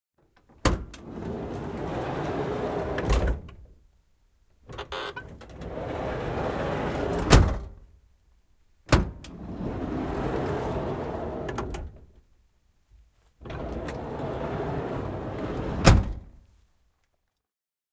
Auton liukuva sivuovi auki ja kiinni. Lähiääni. Ulko. (Toyota Hiace, vm 1990).
Paikka/Place: Suomi / Finland / Vihti, Koisjärvi
Aika/Date: 15.09.1991